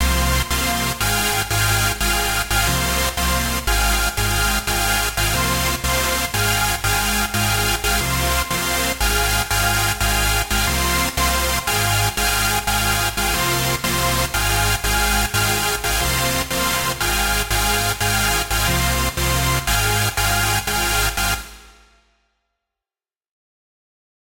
Trance Loop #1
Trance in Serum.
4x4-Records, Club, Dance, Drumroll, EDM, Electric, FX, J-Lee, Music, Pad, Psytrance, Riser, Sample, Trance, compressed, effect, sound, sound-design, sound-effect, sounddesign, soundeffect